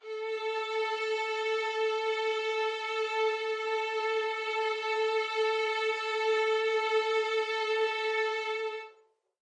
One-shot from Versilian Studios Chamber Orchestra 2: Community Edition sampling project.
Instrument family: Strings
Instrument: Violin Section
Articulation: vibrato sustain
Note: A4
Midi note: 69
Midi velocity (center): 95
Microphone: 2x Rode NT1-A spaced pair, Royer R-101 close
Performer: Lily Lyons, Meitar Forkosh, Brendan Klippel, Sadie Currey, Rosy Timms
a4, midi-note-69, single-note, strings, vibrato-sustain, violin, violin-section